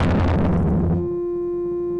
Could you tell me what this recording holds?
sherman shot bomb42 atmosphere
I did some experimental jam with a Sherman Filterbank 2. I had a constant (sine wave i think) signal going into 'signal in' an a percussive sound into 'FM'. Than cutting, cuttin, cuttin...
deep, hard, analog, shot, bomb, atmosphere, percussion, analouge, artificial, perc, filterbank, sherman, harsh, massive, blast